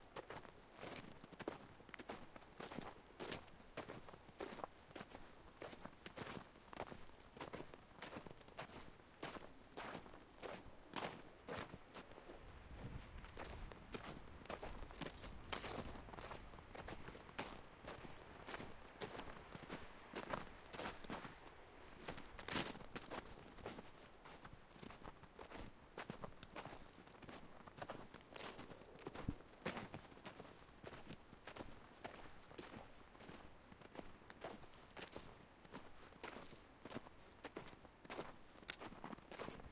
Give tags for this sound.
feet; field-recording; footsteps; outdoor; snow; walk; walking

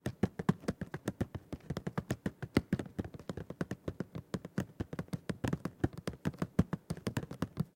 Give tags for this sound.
fruit; percussive; small; dry; close; impact; falling

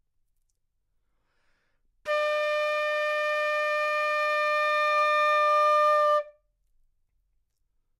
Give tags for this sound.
D5; flute; good-sounds; multisample; neumann-U87; single-note

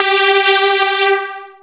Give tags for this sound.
Sound; Synth; synthetic

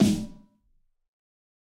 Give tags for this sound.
drum; fat; god; high; realistic; snare; tune

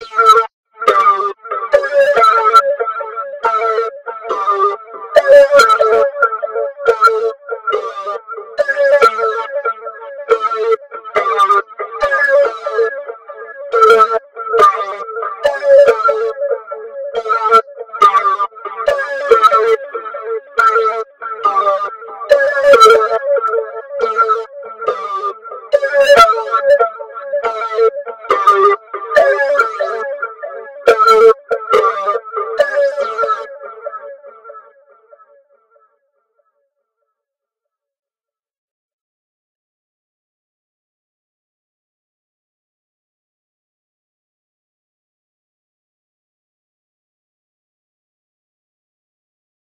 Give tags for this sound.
fx; lmms; vocoder

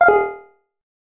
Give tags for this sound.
game; gameaudio; indiegame; sfx; soundeffect

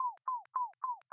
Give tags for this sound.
ping; blipp

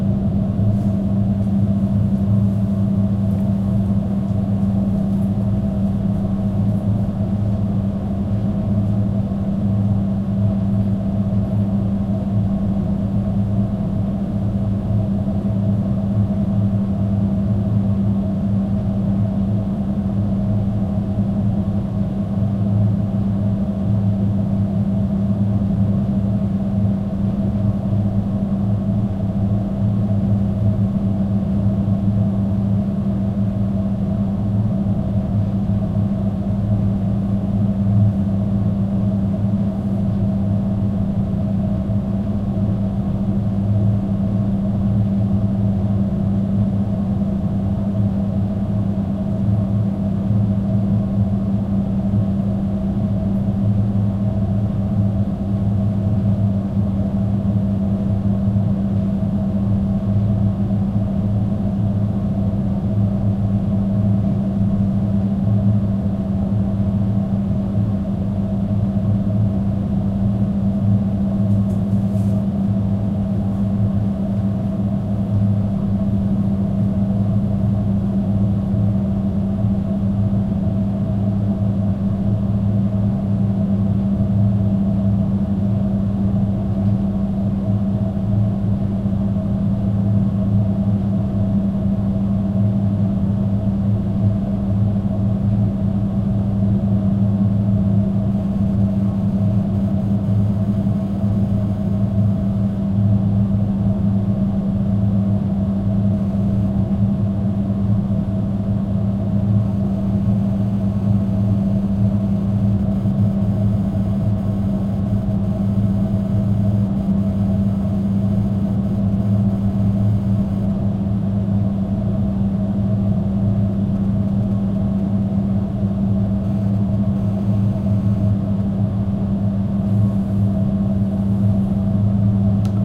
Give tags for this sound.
174; breeze; jimyy

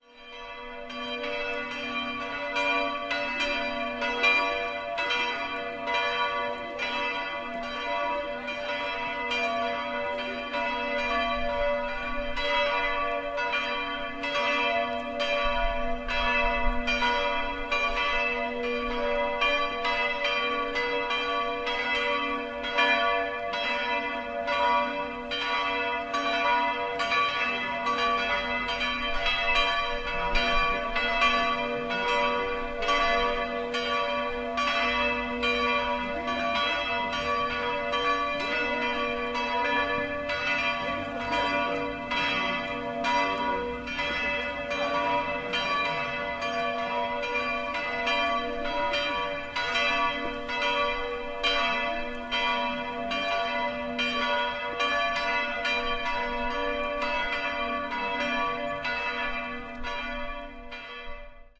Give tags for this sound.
bell; church; cologne; field-recording; noon

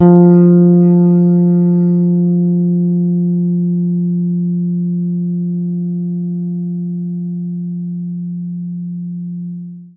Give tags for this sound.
guitar; electric; tone; bass

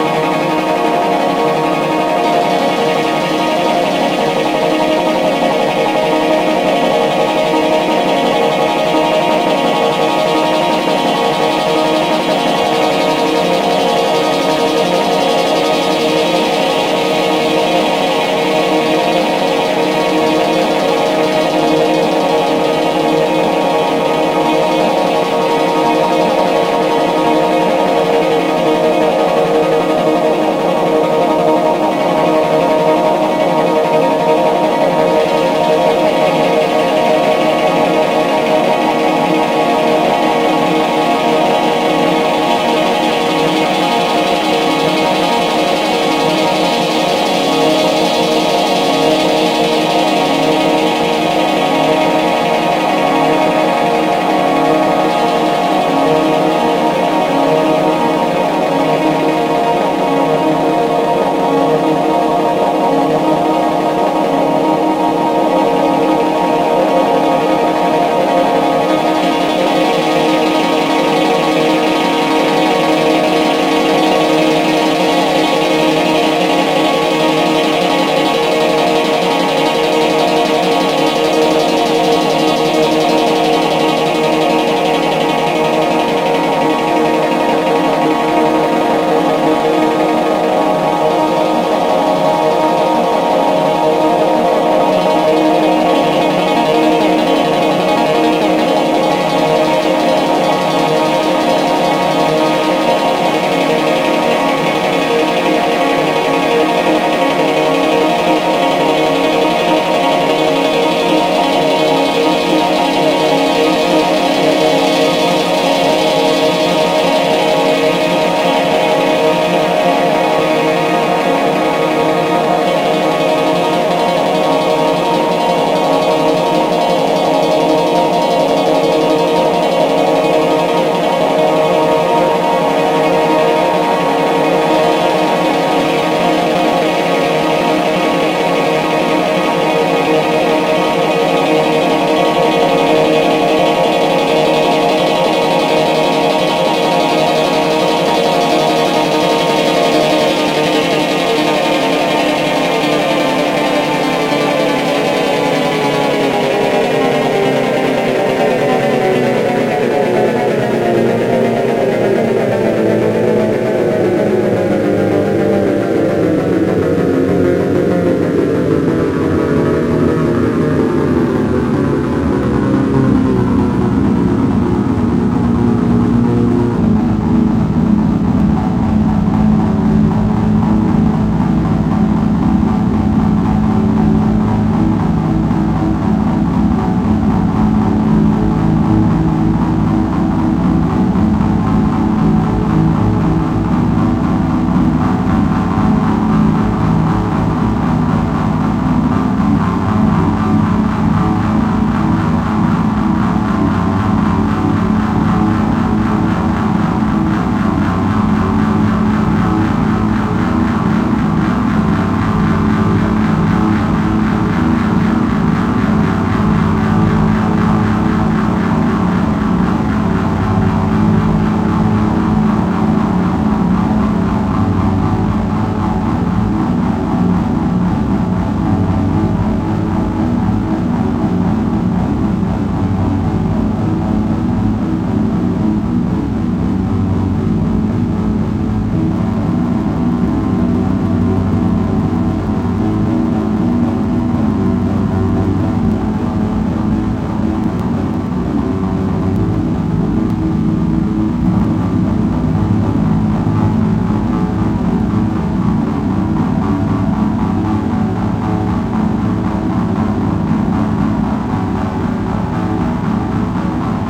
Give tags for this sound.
digital; sliced; synth; synthesis; synthesizer; synthetic

